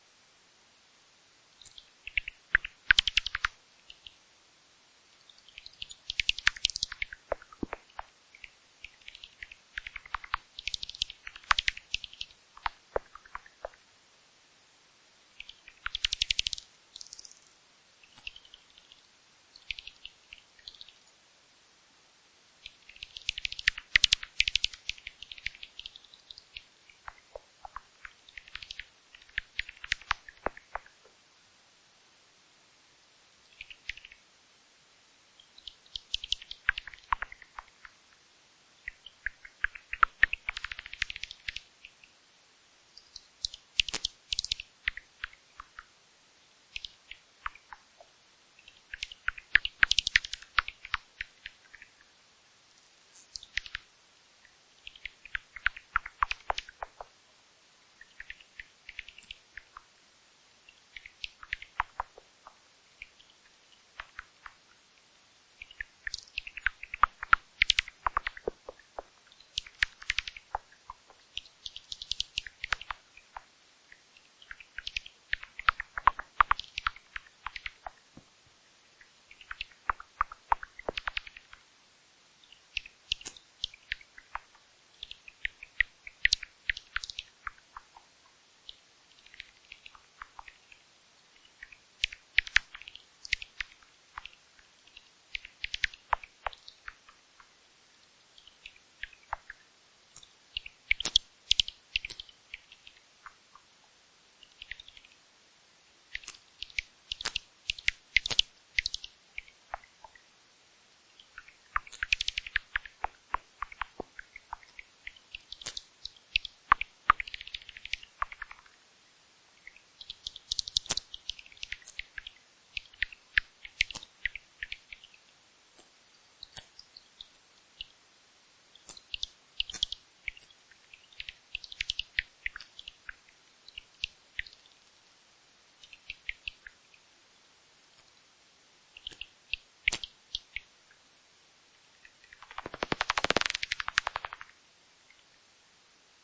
East Finchley Bats 24 July 2018
Recorded in the back garden. I think the bats are enjoying all the airborne bugs in this heatwave.
Microphone: Magenta Bat5
Recorder: Olympus LS10
Processing: Audacity
Location: East Finchley
Bat species: Unknown
wildlife, Bats, nature, field-recording